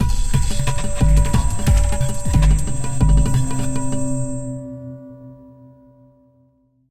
Irridesen Guitar Books Style
ambient, glitch, idm, irene, irried, jeffrey, spaces